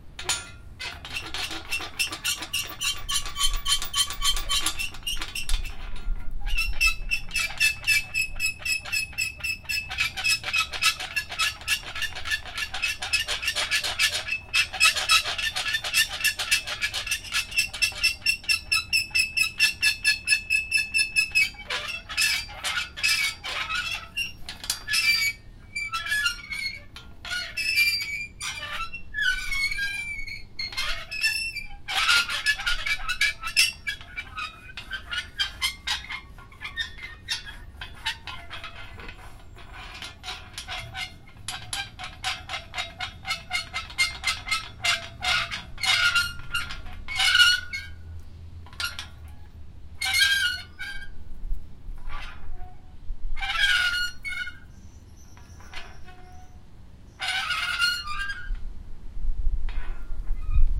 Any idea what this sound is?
Rueda oxodada y hamacas
Sonido de robot viejo y destartalado andando.
Solo para carcaza, no motor.
24-48
robot-movement, Robot, motion